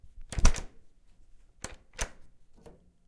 Door Handle Open
Easily opening a wooden door. Recorded with Zoom H4.
close, closing, door, doors, handle, moving, open, opening, wood, wooden